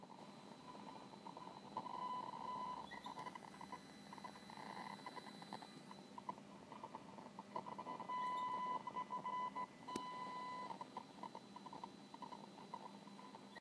White noise from a speaker
speakers, electronic, noise